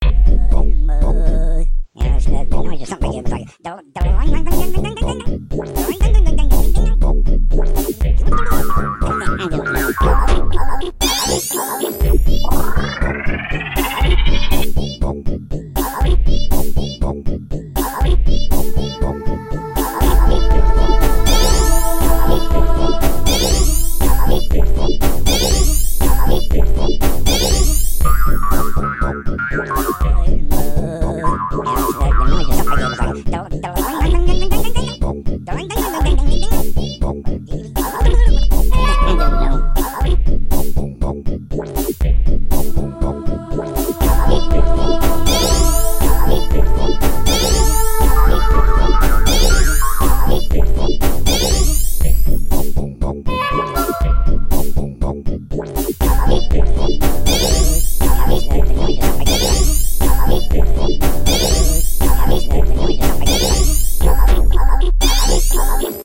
I created these Drum Beat/loops using my Yamaha PSR463 Synthesizer, my ZoomR8 portable Studio, Hydrogen, Electric Drums and Audacity. I'm sorry but Roland is DEAD... I killed him. It was an accident.